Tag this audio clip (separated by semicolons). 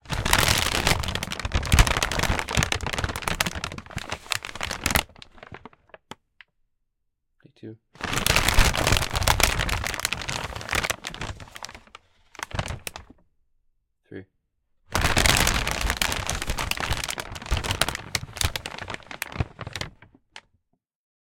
over; tarp; bag; bodybag; pull; plastic; body